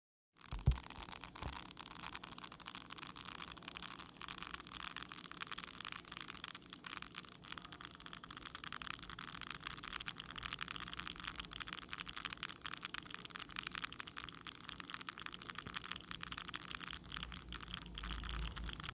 Termites Sound [ Anay in tagalog ]
termites-sound, sound-effect, anay